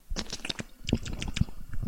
delicious lip-smacking goodness
lips, mouth, tongue